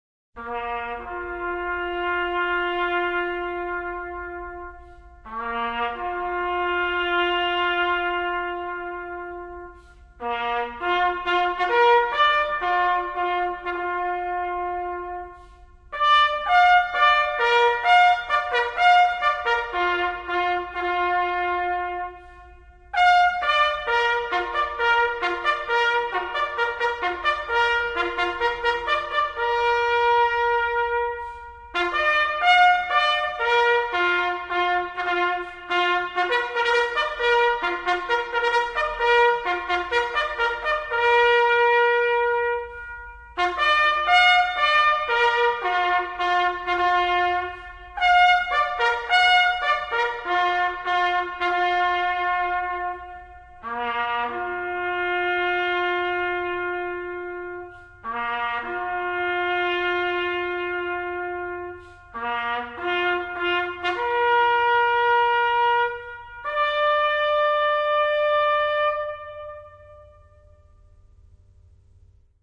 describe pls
A stereo recording of a bugler rehearsing the Last Post in a hall.Mixdown from two recordings, both of which had a squeaky self closing door noise present. Zoom H2 front on-board mics.